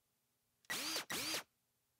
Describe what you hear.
drill double rev